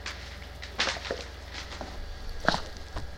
ducttapenoise rub
The sound of two rolls of duct tape being rubbed against each other,
with the sound of computer cooling fans in the background. Two "beats",
without any real measure, with some sound related to the plastic
packaging the rolls of duct tape. This sound is similar to the sound of
a sheet of paper hitting something.